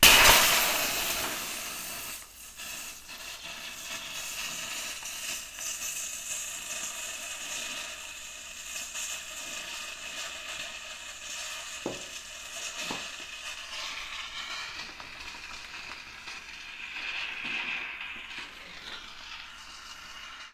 This is a sample from the plug on the bottom of a shaving cream can getting pulled out and the resulting release of air pressure and shaving cream. If you're good enough at editing, you can make this into an explosion, a weapon, a hydraulic release, or some sort of gloopy lava bubbling sound, or anything else you can create with this. Credit would be nice but is not required. Recorded with a TASCAM DR07 DISCLAIMER: Potentially dangerous actions were performed to create this sound. I don't recommend attempting to recreate this and I'm not responsible for your actions.